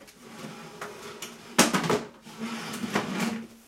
chaise glisse13
dragging a wood chair on a tiled kitchen floor
tiled, floor, chair, furniture, wood, dragging, squeaky